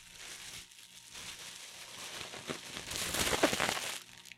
plastic!!!
Do you have a request?